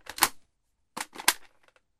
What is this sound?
Recorded from a steyr aug airsoft gun. Reload sound in stereo.